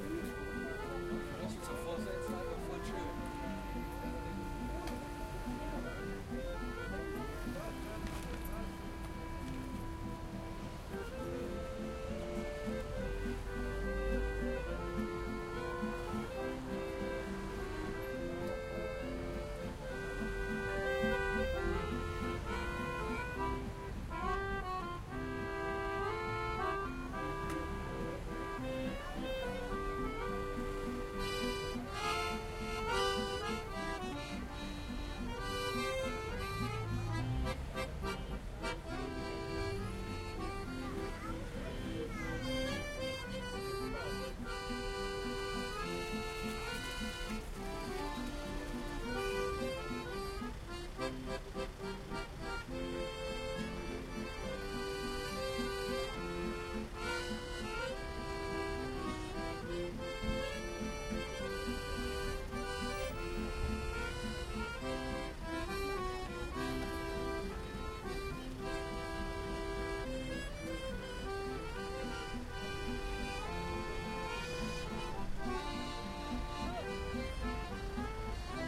120114 street musicians
Two street musicians from eastern europe sitting on the sidewalk of a shopping street in a suburb of Cologne playing a simple well known tune with guitar and accordeon just repeating one single harmonic sequence of the song all the time. Zoom H4n